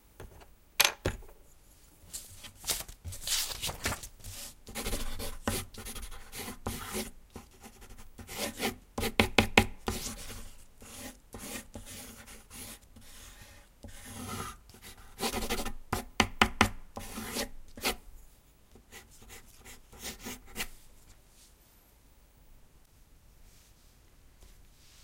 Recording of a fast sketch done with soft (3B) pencil on rough paper. The paper is attached to a wooden board. Equipment: cheap "Yoga EM" microphone to minidisc, unedited.